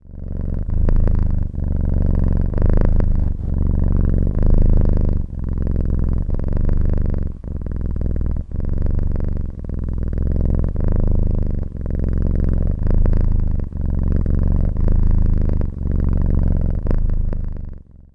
I put the mic on the cat's Adam's apple and this is what you get. (I can't get enough of this...)
purring, cat